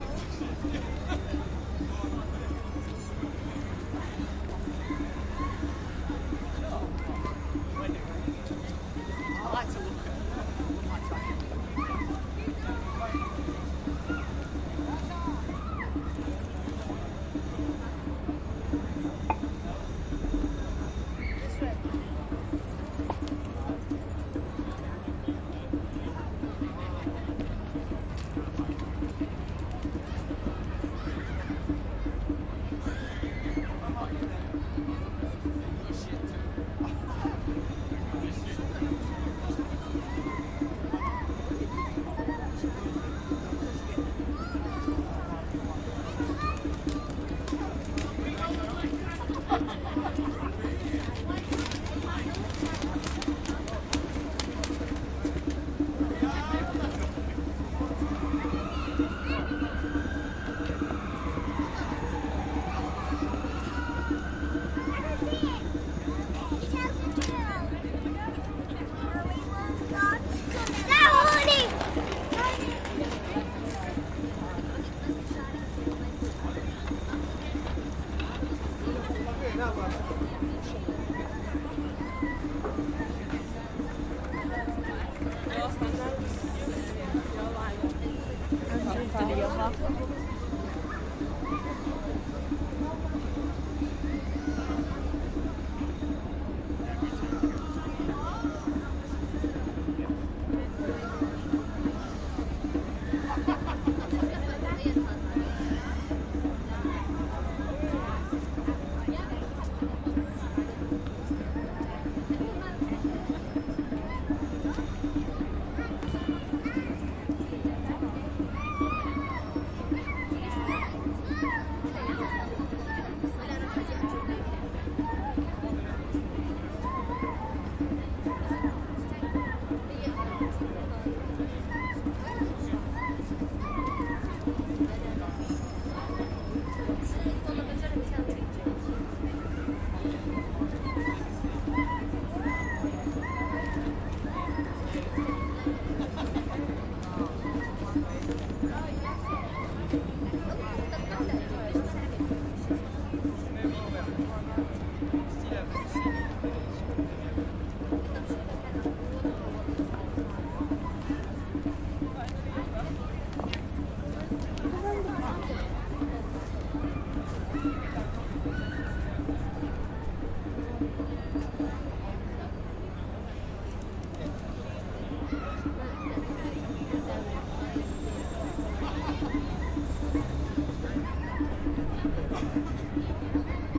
Piccadilly Gardens in Manchester, Spring 2017
gardens, manchester, Piccadilly, street